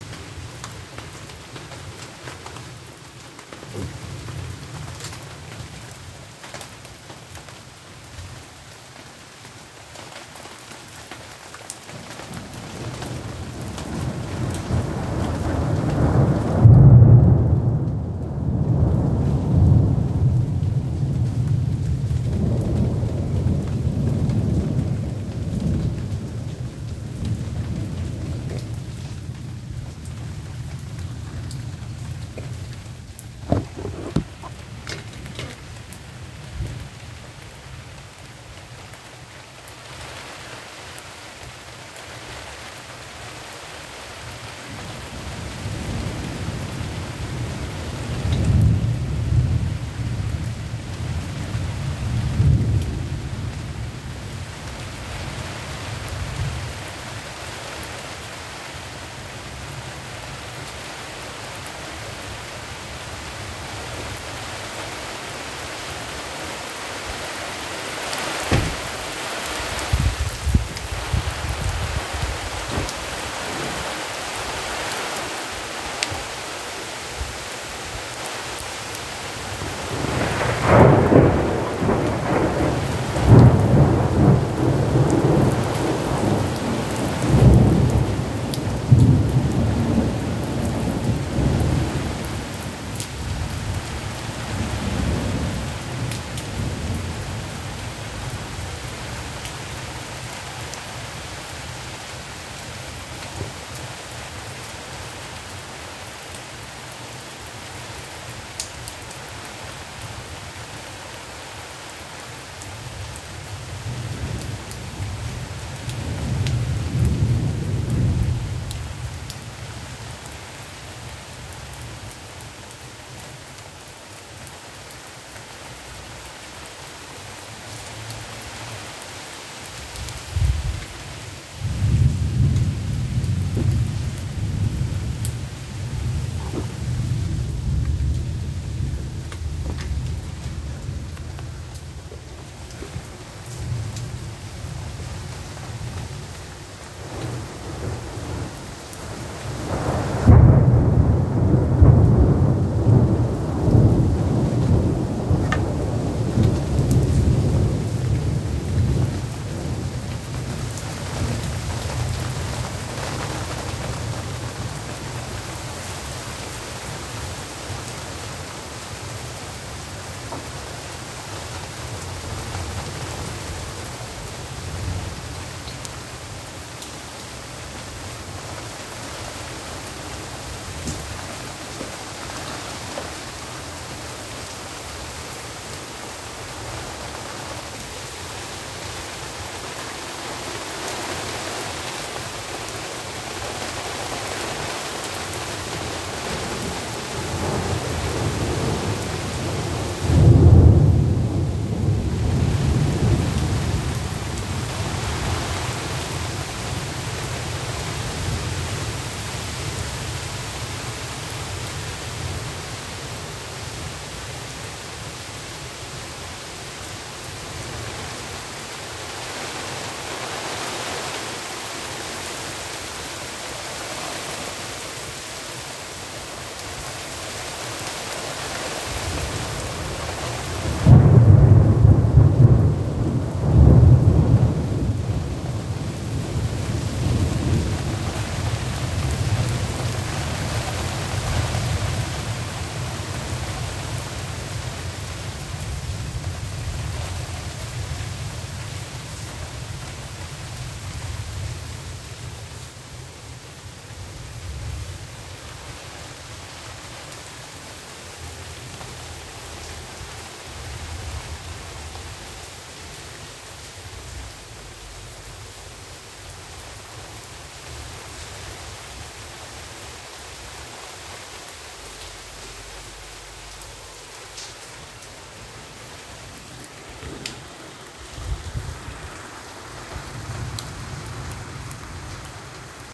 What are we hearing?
Thunderstorm with Heavy Rain
A raw recording of thunderstorm that my father recorded, Istanbul, Turkey.
Heavy thunderstorm interrupting sleep at 3 am (but it didn't wake me up)
thunderstorm, shower, atmosphere, thunder-storm, weather, ambient, ambience, rain, drip, raining, nature, ambiance, rolling-thunder, storm, rumble, field-recording, lightning, water, thunder